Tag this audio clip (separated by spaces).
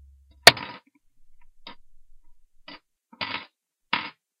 drop metal